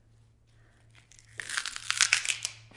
celery break once